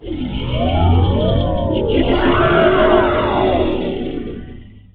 Oh WoW2
Audio, Background, Effect, Electronic, Funny, Sound, Spooky